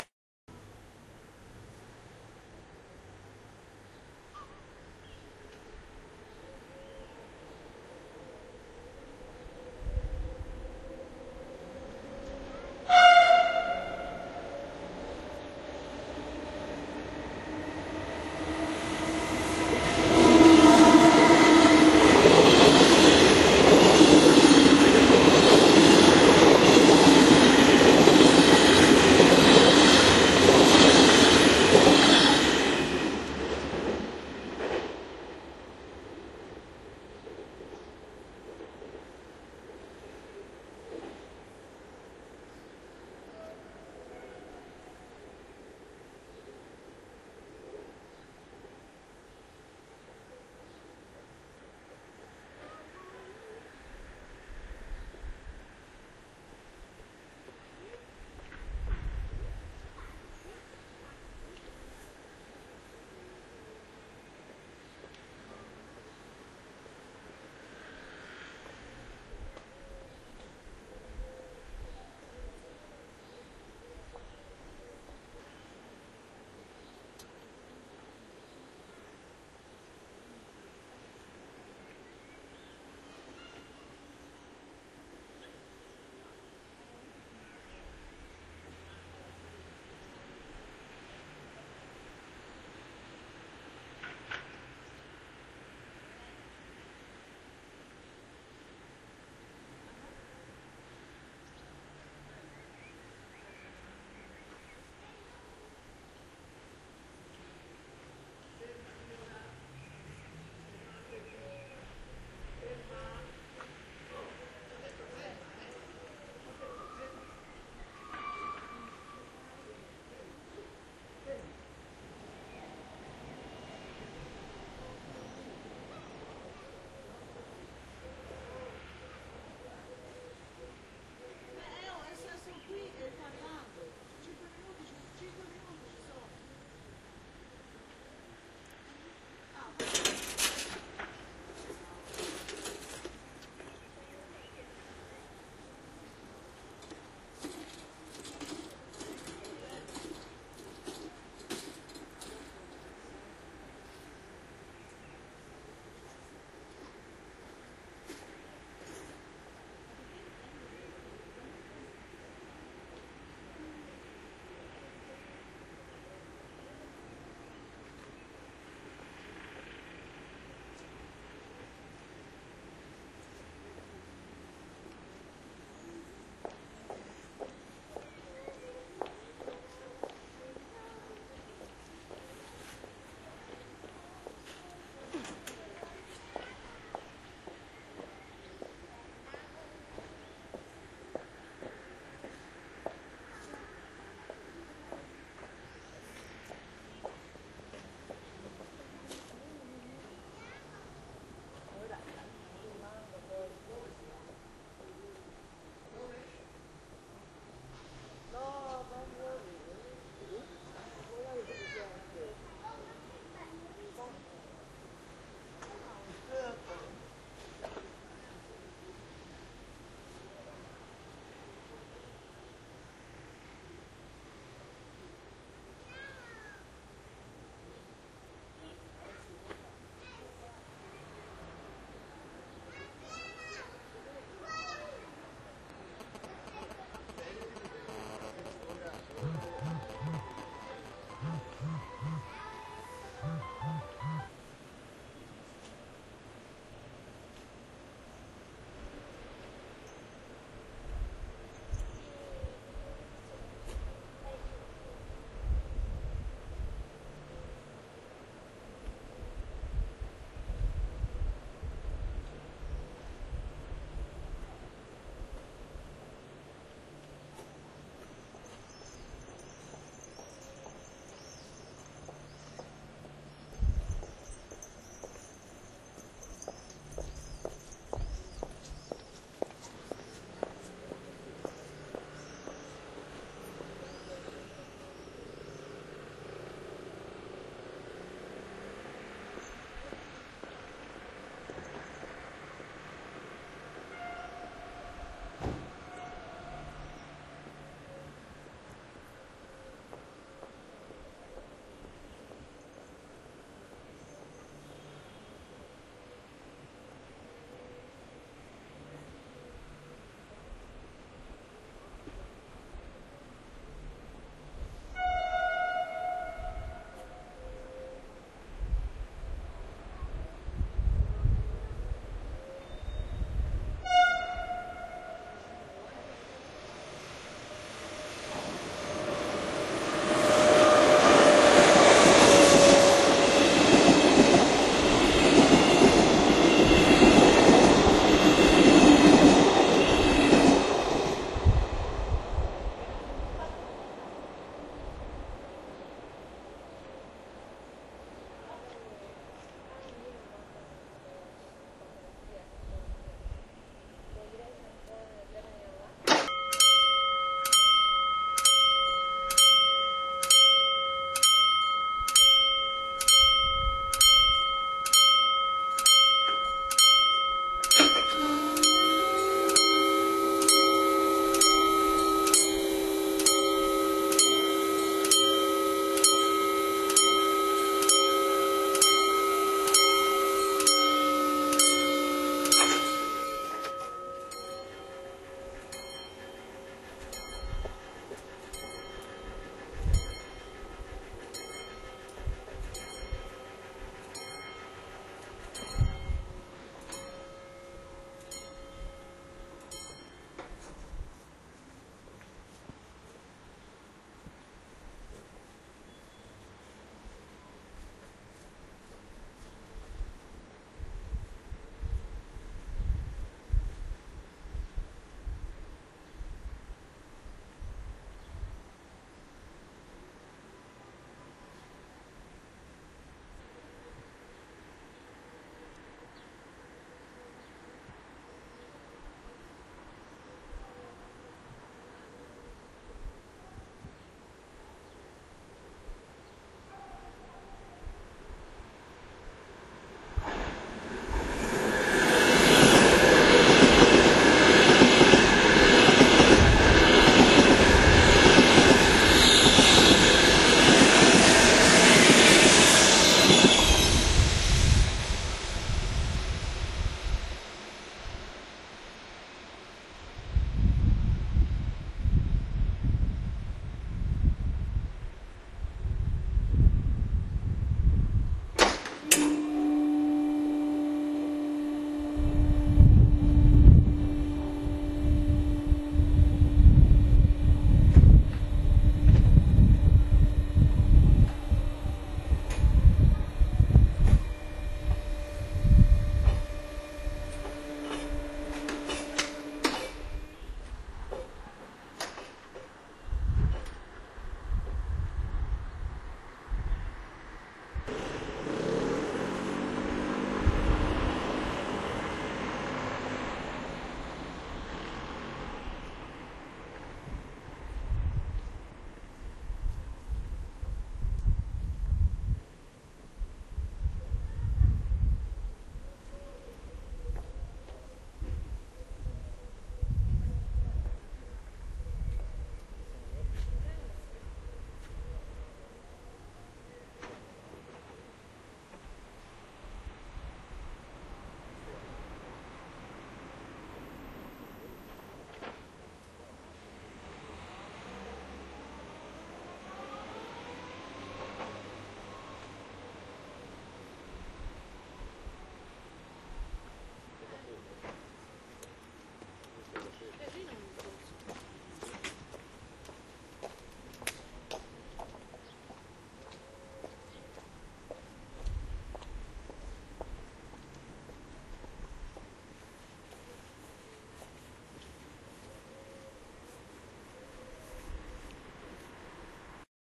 9 sequences took at the railway crossing of via Vittorio Emanuele II in Florence (Italy) the 20th May 2008 from 17,51 to 18,04. The sequences are taken with a video camera from a fixed point of view towards only one direction, south, with different sequences. The mic is a micro stereo Sony connected with the camera. The idea was to study the simultaneity of the several plans of the image. The camera is by hand. In the video it does not succeed anything of relevant if not the passage of the unexpected train, the sounds of the motor of the level crossing, some voice or noise. The time is suspended in the recur of the events. Actually the level-crossing no longer exists.
You can see the video here: